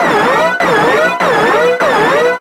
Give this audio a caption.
Tense alarm sound.
warning, digital, klaxon, synth, alarm, siren